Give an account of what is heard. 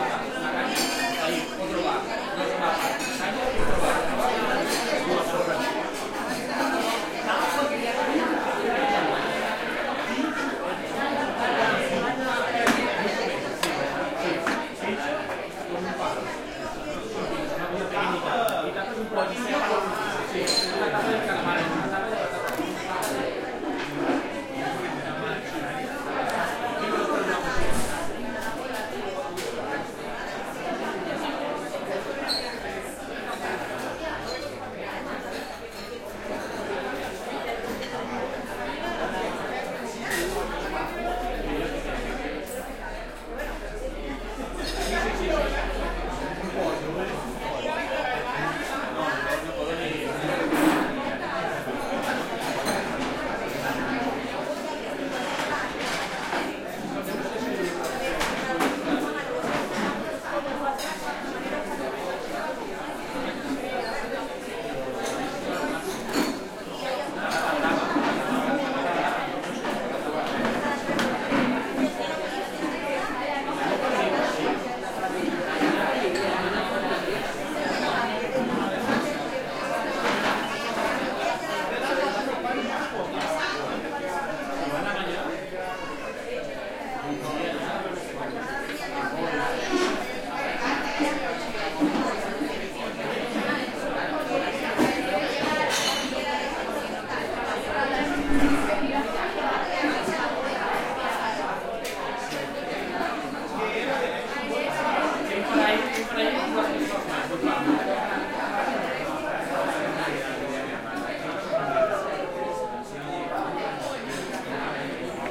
cafe ambience barcelona
This recording is done with the roalnd R-26 on a trip to barcelona chirstmas 2013.